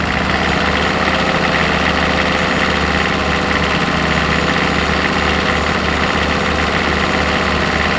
S008 Engine Medium Rev Mono
Engine noise at a medium trottle rev
Vehicle, Rallycar, Engine